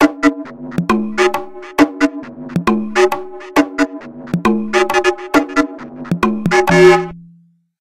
Taiwan Canal
A Type of sound that may come from a canal in taiwan